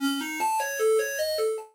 Beep Pattern
This is a sound effect I created using ChipTone.
abstract, ai, beep, chiptone, communications, computer, digital, effect, electronic, future, fx, glitch, loop, machine, pattern, random, robot, robotics, sci-fi, sfx, signal, sound, space, spaceship, strange, videogame, weird